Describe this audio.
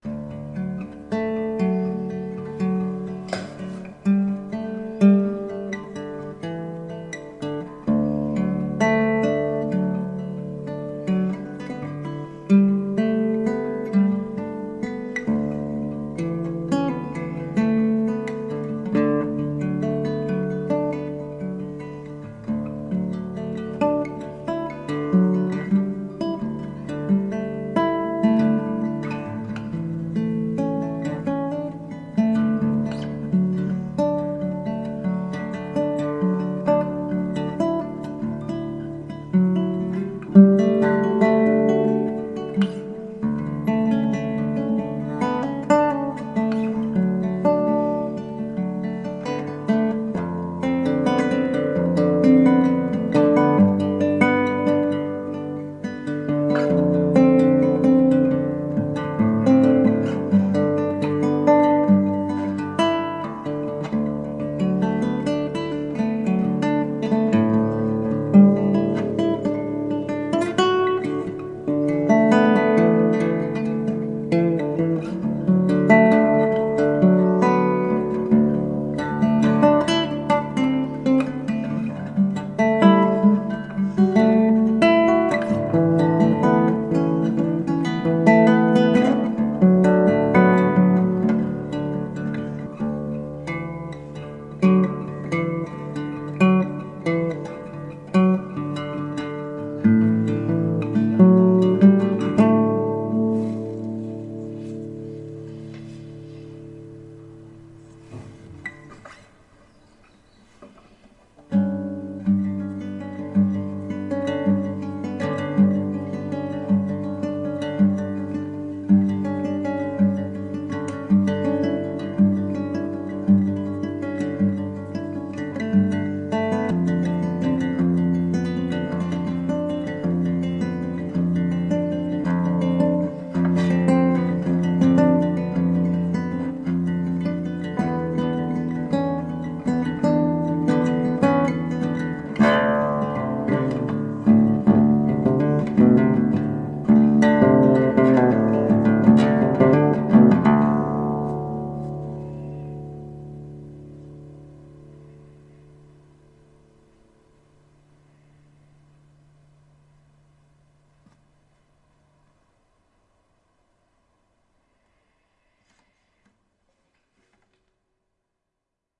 BCO guitar 2021-05-31
Yamaha C40 nylon string guitar played in DADDAB tuning.
classical, nylon, clean, acoustic